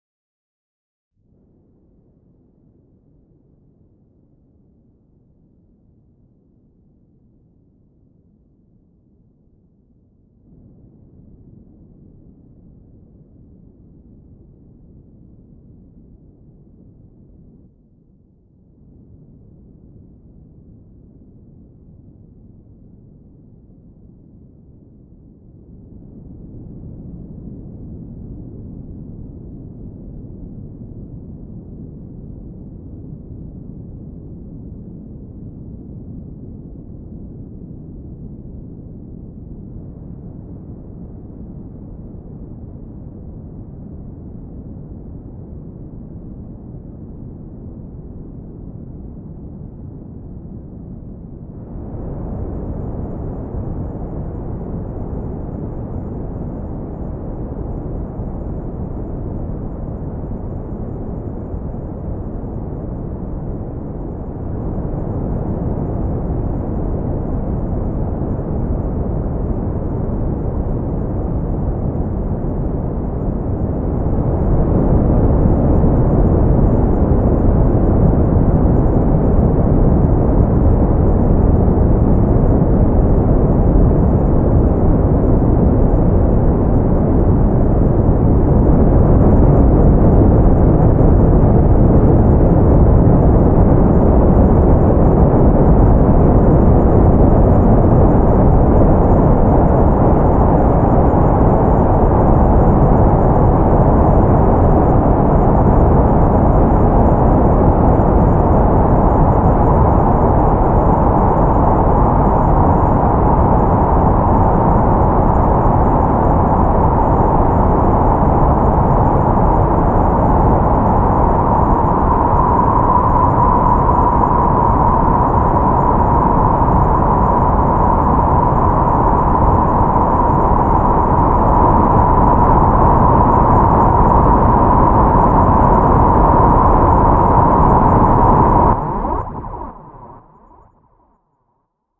Clustered wind buildup for games
I made this wind with the Thor-module in Reason. I let each passage of several seconds go without changing anything on the synth. This should make this sound easy to incorporate in games where you just have to define, or cut out, the stable parts and crossfade between them ingame.
wind, buildup, noise